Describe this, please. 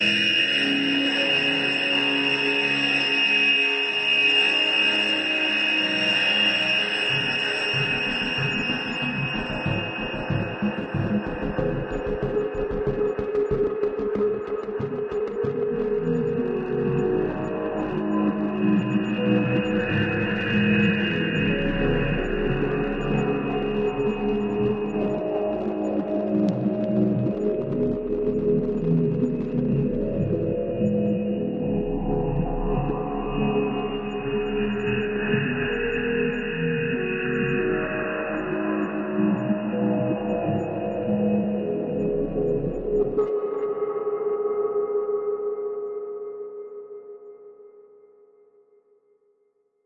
MSfxP Sound 534
Music/sound effect constructive kit.
600 sounds total in this pack designed for whatever you're imagination can do.
You do not have my permission to upload my sounds standalone on any other website unless its a remix and its uploaded here.
Menu, sound, music, effect, synth, UI, percussion, stab, fx